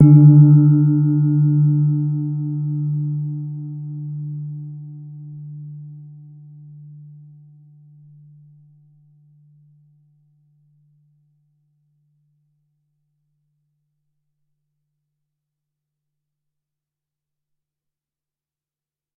Gong (Vietnam, big) 02
Vietnamese gong about 43cm ⌀. Recorded with an Oktava MK-012-01.
Vietnam, gong, metal, percussion